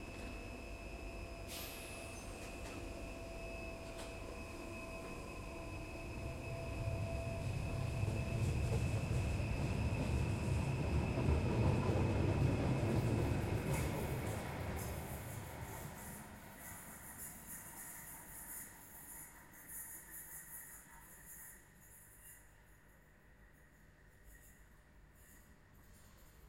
interior train subway underground metro leaves covered station and departs overground
leaving, departing, travel, station, departs, tunnel, underground, light-rail, field-recording, train, subway, travelling, interior, leaves, metro